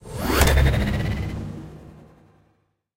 UI, Mechanical, Notification, 01, FX
An artificially designed user interface sound with a mechanical aesthetic from my "UI Mechanical" sound library. It was created from various combinations of switches, levers, buttons, machines, printers and other mechanical tools.
An example of how you might credit is by putting this in the description/credits:
And for more awesome sounds, do please check out the full library or SFX store.
The sound was recorded using a "Zoom H6 (XY) recorder" and created in Cubase in January 2019.
interface, machine, mechanical, message, notification, popup, ui, user, userinterface